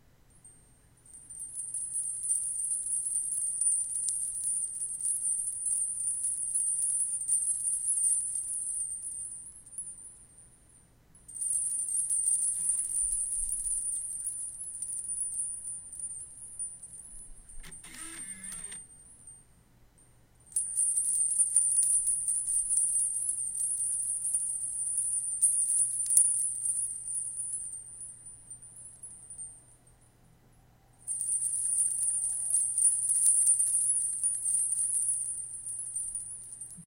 My aunt has some bells that sound light, delicate, and ethereal. She said they are from India. There are two strands of brass bells about the size of an acorn each. You are hearing both strands being shaken vigorously in this recording. I believe my Blu ray drive also was briefly captured accidentally.